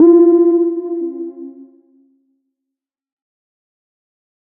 Blip Random: C2 note, random short blip sounds from Synplant. Sampled into Ableton as atonal as possible with a bit of effects, compression using PSP Compressor2 and PSP Warmer. Random seeds in Synplant, and very little other effects used. Crazy sounds is what I do.
house, synthesizer, sci-fi, rave, effect, sound, random, resonance, bounce, techno, electro, 110, electronic, glitch, dance, synth, hardcore, glitch-hop, bpm, club, lead, processed, acid, porn-core, trance, noise, blip, dark